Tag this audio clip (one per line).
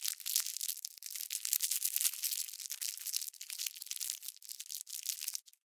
wrapper
crinkle
candy